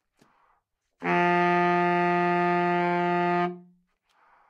Sax Baritone - F#3
Part of the Good-sounds dataset of monophonic instrumental sounds.
instrument::sax_baritone
note::F#
octave::3
midi note::42
good-sounds-id::5291